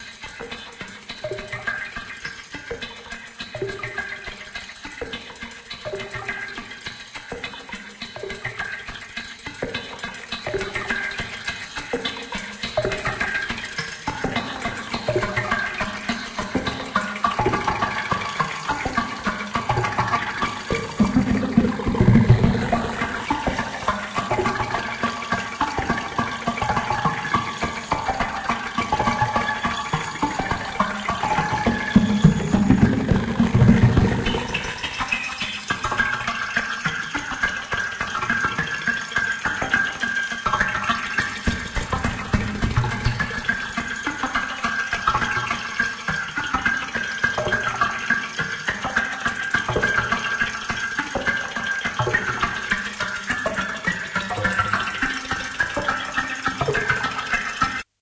qy700juno106+harmonizer
A repeating synth line with recorded cutoff and effects changes
analog, delay, pitchshifter, synth, synthesizer